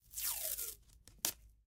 masking tape pull and tear short
a short piece of masking tape being pulled and torn off of a roll